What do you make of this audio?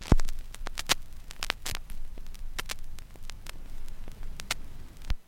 The stylus hitting the surface of a record, and then fitting into the groove.